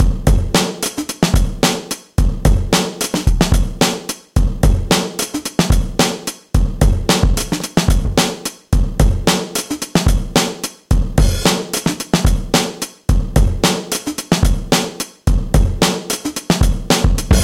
jACKED dRUMS 110
loud breakbeat inspired by Jack Dangers. Drum loop created by me, Number at end indicates tempo
beat, drumloop, drum, breakbeat, loop